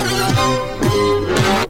Reel Orch Tape Chop
chop, orchestra, reel-to-reel, tape